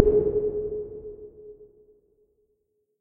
A tone generated in Audacity at 417 mHz.
—VJ